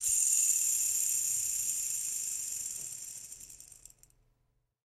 ball bearing lng
ball bearing sound
ball, sound, bearing